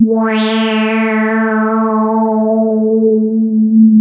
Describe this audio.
Multisamples created with subsynth. Eerie horror film sound in middle and higher registers.
evil, horror, multisample, subtractive, synthesis